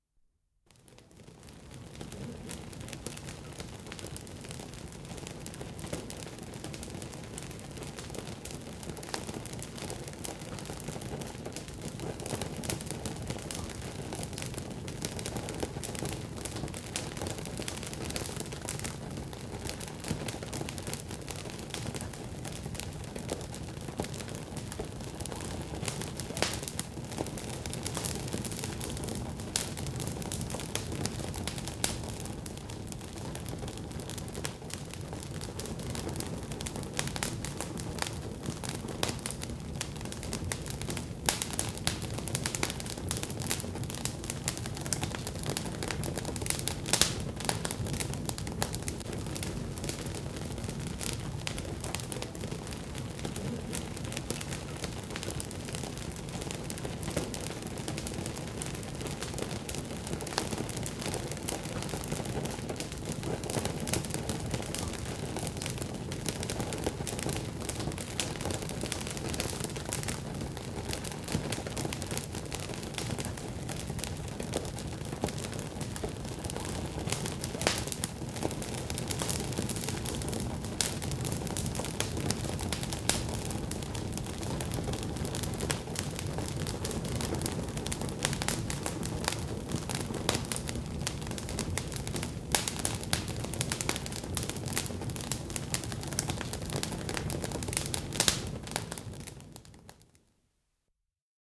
Nuotio luolan suulla / Campfire at the mouth of a cave, burning, flames crackle, some echo of the cave
Nuotio palaa, tulen ritinää ja rätinää. Hieman luolan kaikua.
Paikka/Place: Suomi / Finland / Ahvenanmaa, Askö
Aika/Date: 19.05.1988
Luola, Finnish-Broadcasting-Company, Field-Recording, Tuli, Cave, Tulitikku, Crackle, Nuotio, Cracking, Hum, Suomi, Soundfx, Liekit